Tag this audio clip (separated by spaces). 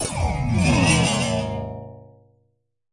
Bend Bow Curve Flex Inflect Saw Sawblade Squeaking